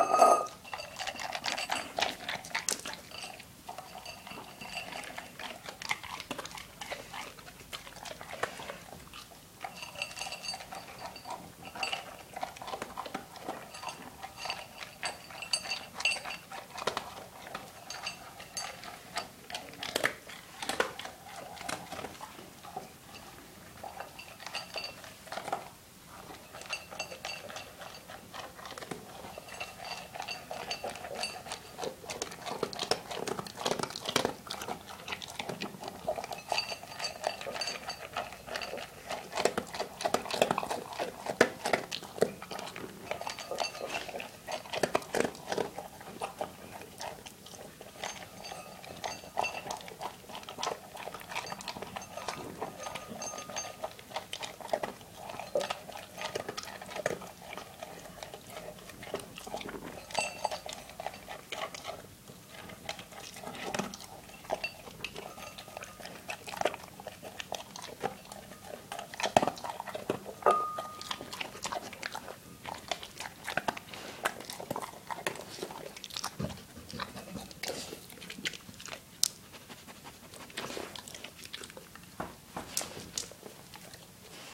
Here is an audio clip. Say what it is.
dog eating
A dog (male black Labrador retriever) eating dog food.
chew, chomp, dog, eat